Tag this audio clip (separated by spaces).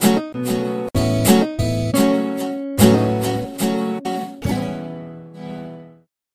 electronic FL Guitars loop made sound studio